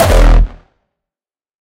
Hardstyle Kick E2
a Kick I made like a year ago. It has been used in various tracks by various people.
dong, roland, c, 909, drumazon, harhamedia, access, rawstyle, sylenth1, hardstyle, kick, tr-909, virus, raw